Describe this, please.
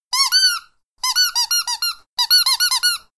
Squeaking dog toy.
tascam, dr-100, dog-toy